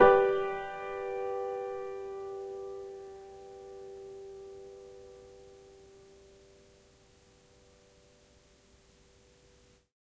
Tape Piano 19
Lo-fi tape samples at your disposal.
collab-2, Jordan-Mills, lo-fi, lofi, mojomills, piano, tape, vintage